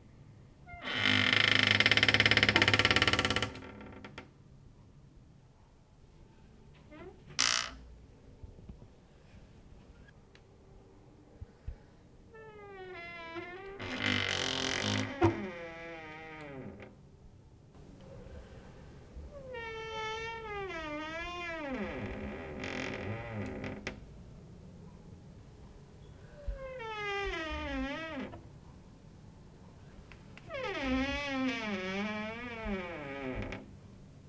Porta rangendo, vários takes.